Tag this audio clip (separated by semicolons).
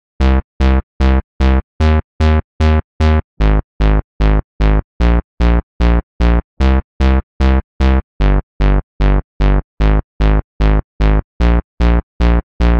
bass; bmp